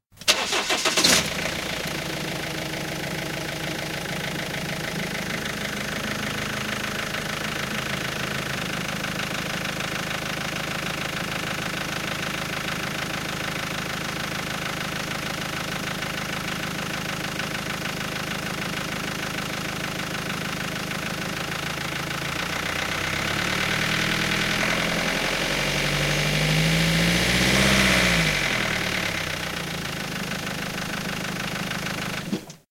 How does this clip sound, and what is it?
This is a BMW 320d (diesel 2000cc) vehicle. I start the engine, walk it a little, after i step to the gas a bit in neutral gear. Then i stop the motor.